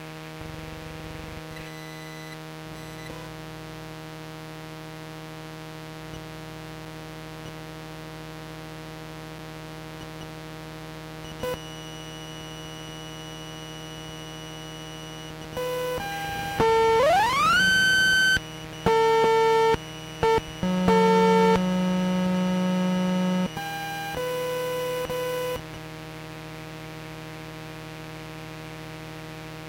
broken atm
This ATM is supposed to talk to blind users when they plug headphones. Unfortunatelly, it sounds like some game from 90s. The most interesting part is when you get the money - rising tones, beeps and stuff. Recorded dyrectly via audio jack cable.
atm, bank, beep, buzz, money